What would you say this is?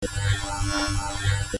An electronic scanner. Similar to a decontamination laser.
computer, static, digital, electric